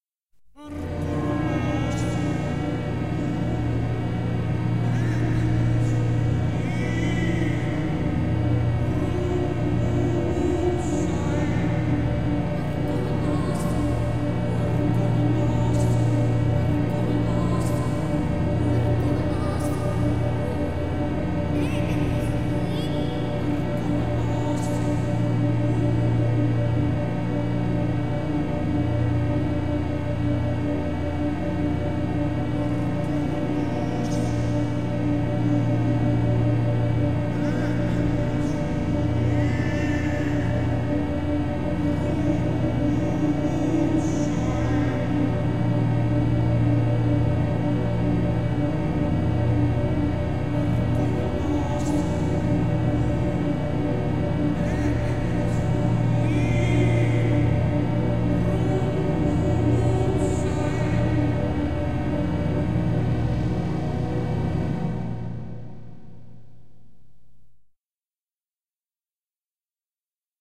ambience horrible nightmare